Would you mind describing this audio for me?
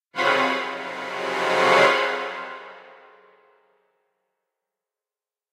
Dramatic Orchestral Crescendo
A suspenseful orchestral crescendo. Perfect for dramatic moments in video games or movies.
Made with Garageband and Ableton with string and brass instruments.
horror, game, cinematic, strings, brass, orchestral, suspenseful